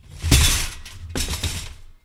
bang crash
The sound of a shopping cart going up the stairs. (Used in a production of Sideways Stories from Wayside School - a sound that scares the children, but then when we see the shopping cart we realize what it is) Created by recording a shopping cart with some stuff in it going down a step. Loopable.
metal, stairs, shopping-cart, crash, bang-crash, rattle